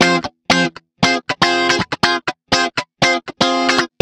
cl min a2
Some clean, minor, rhythmic riff on stratocaster guitar. Recorded from Line6 Pod XT Live.
rhythmic, minor, clean, guitar